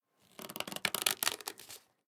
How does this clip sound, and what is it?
frotar papel con dedos
fingers, paper